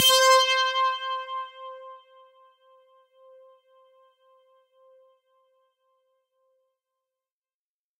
This sound was created using ZynAddSubFX software synthesizer.
Basically it's a distored 'pluged string' sound.
I used the integrated wave recording to sample the notes.